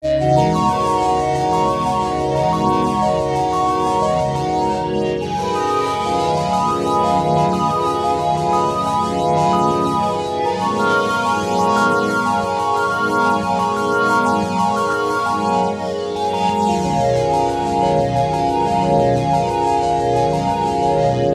Sound made with Codex Wavetable Synth Plugin